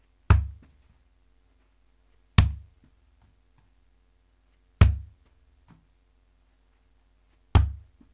kicking a ball
ball, kicking